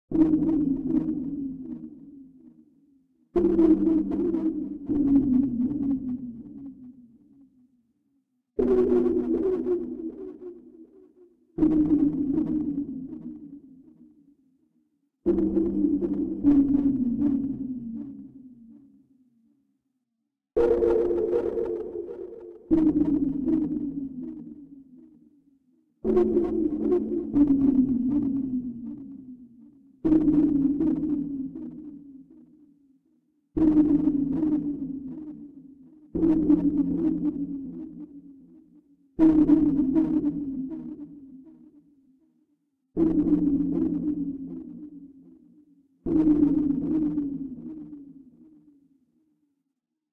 HV-bruit-primordiale
Sound that couldn't be heard just before The Big Bang.
Made with Nlog PolySynth, recorded with Audio HiJack, edited with WavePad, all on a Mac Pro.
sfx, fx, effect, strange, freaky